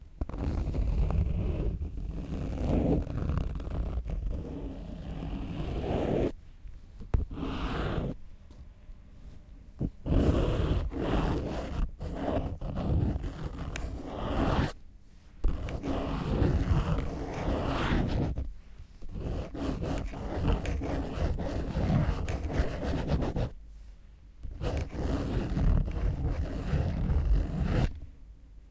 SIGNING PAPER SCRIBE WITH FOUNTAIN PEN QUILT SCRATCH IN STEREO
Scratch of a quilt signing a scribe